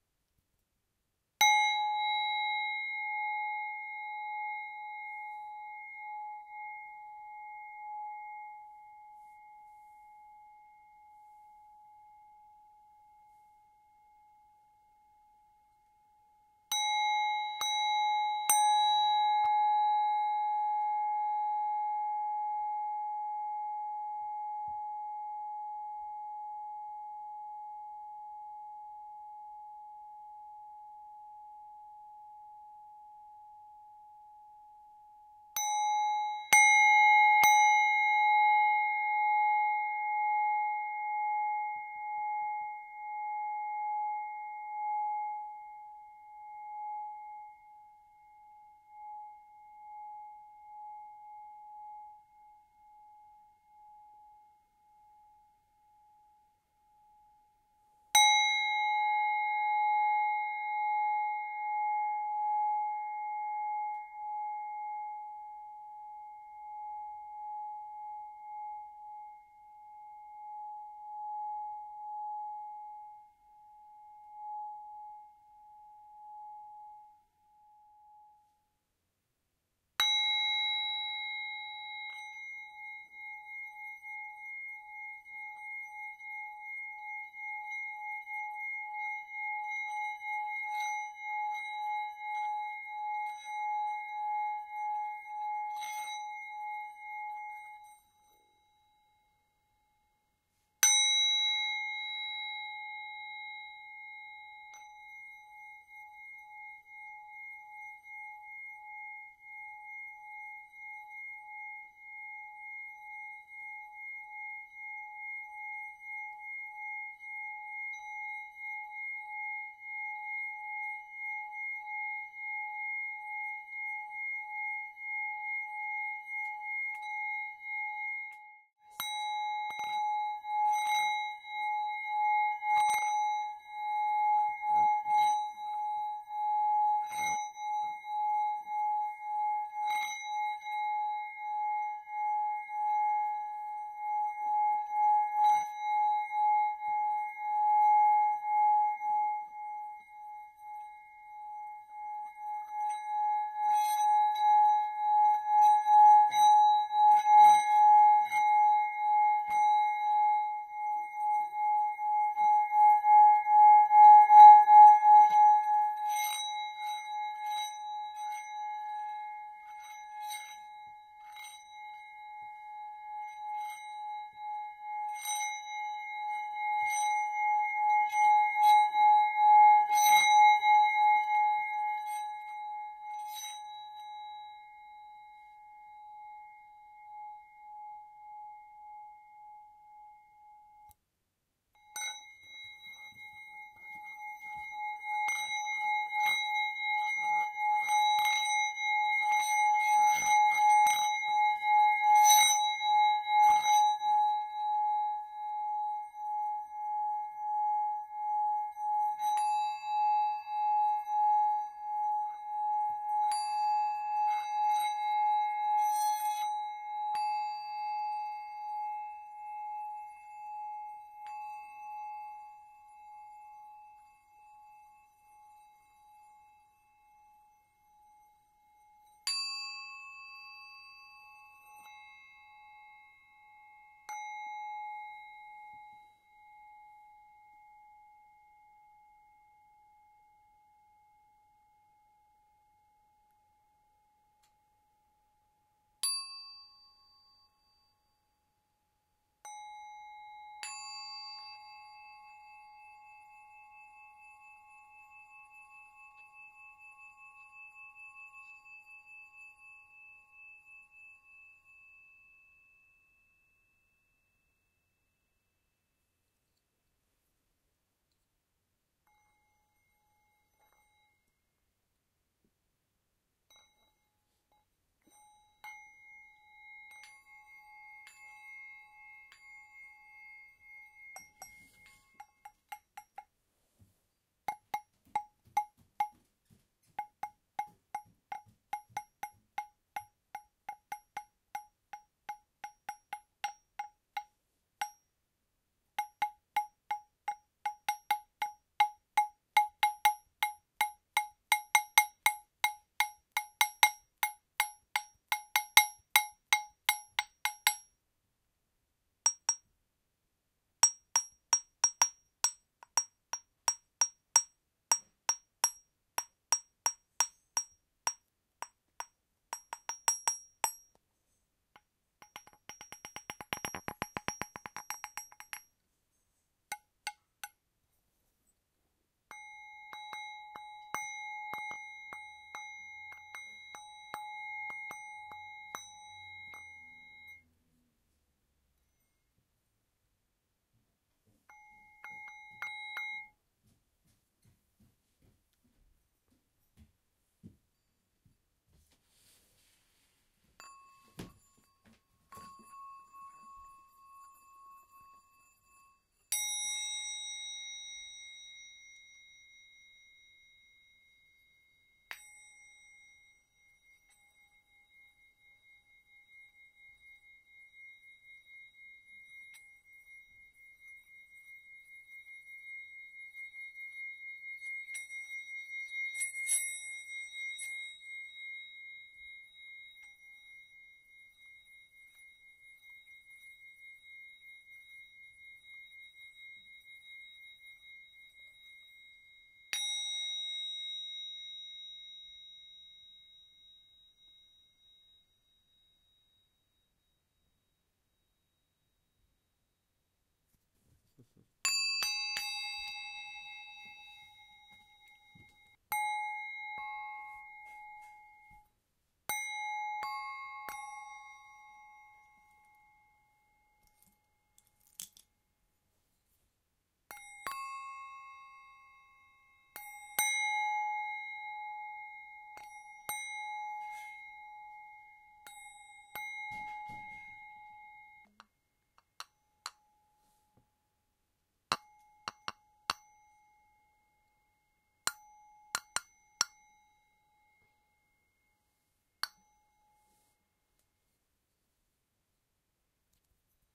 Tibetan Singing Bowls Improv
Percussive and drone sounds made with several Tibetan singing bowls, from the instrument collection of my friend in Kashiwa, Japan.
Recorded with Zoom H2n in MS Stereo.